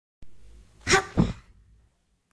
jumping "hop!" sound!
Toon voice saying "hop" as he/she jumps and lands on the floor
alehop, cartoon, effort, hop, jump, Jumping, landing, sound, toon